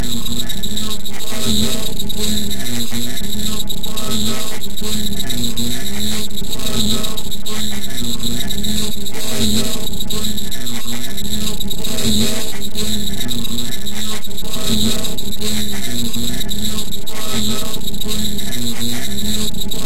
Experimentation with programs that i "Rediscovered". I didn't think these "New" programs were worthy of using, but to my surprise they are actually extremely interesting to work with!
These are really some bizarre effects that were produced with the new programs.
:::::::::::::::::::::::::: Enjoy!